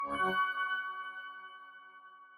game button ui menu click option select switch interface